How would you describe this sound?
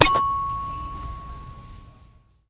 My toy piano sucks, it has no sustain and one of the keys rattles. This really pisses me off. So I went to Walmart and found me a brand new one, no slobber, no scratches, no rattling. The super store ambiance adds to the wonder.
piano, toy, xylophone